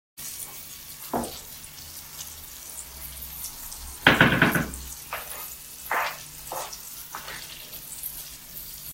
Home cooking.
Recorded: Iphone RODE with VideoMic microphone.
fry, cook, roast, kitchen, Cooking, oil, mixing, meat, food